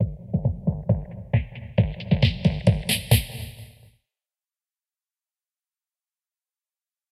filter fill 01
a re-edit of this::
filtered it a few times and added a few reverbs. all done in cool edit...i mean adobe audition.